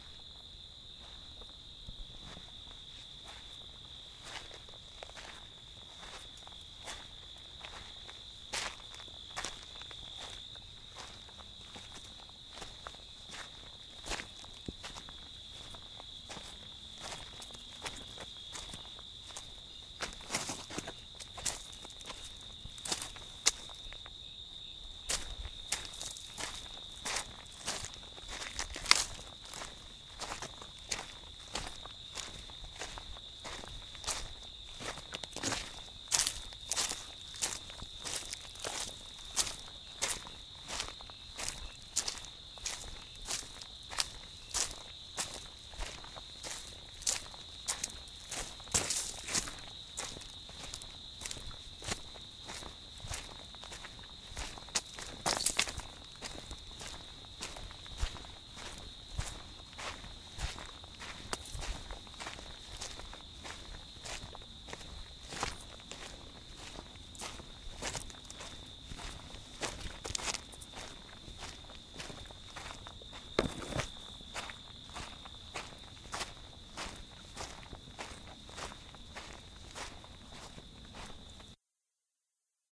walking at night
recording of walking the dirt road at night
quiet, night, crickets, walking, footsteps